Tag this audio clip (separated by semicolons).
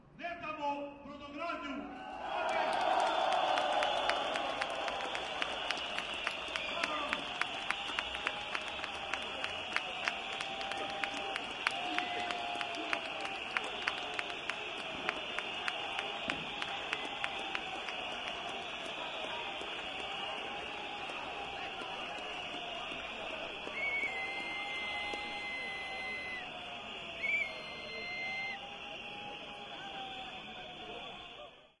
demonstration; documentary; labour; whistle